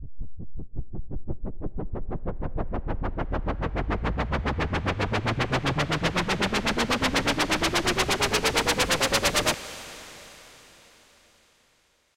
Helicopter sound made using LFO on VST synth
helicopter, LFO